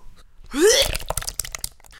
Spew Two
The old classic vegetable soup tipped into a plastic toy army helmet. Simple as that.
chuck, gag, huey, nausea, sick, up, Vomit